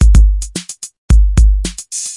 Starter001 120bpm p1
808 groove no FX
rhythm, percs, drum-loop, beat, groovy, perc, drums, drum, groove